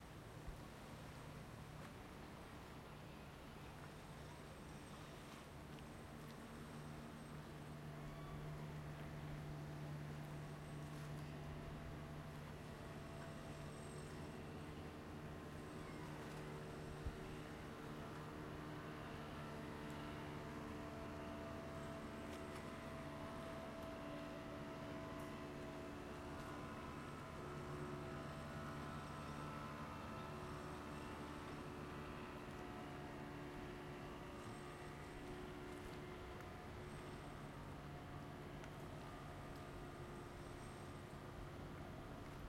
Bangkok Peninsula Pier Passing Boats Construction Noise
boat, engine, field-recording, river